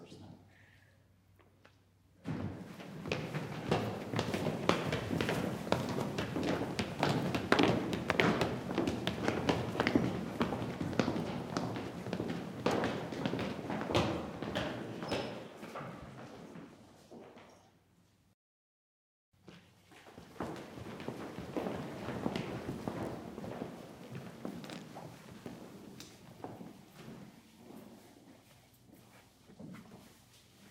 Group walking in auditorium
Scattered walking in an auditorium
auditorium, court, footsteps, gym, gymnasium, walking